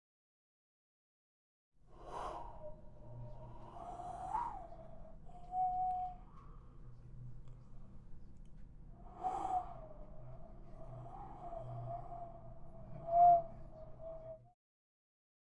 An ambient gust of wind